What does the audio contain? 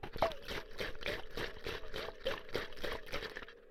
Water Bottle Shaking
Water, shaking, liquid, bottle, metal